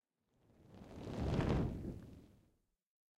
22 FIACCOLA PASS
effects, torches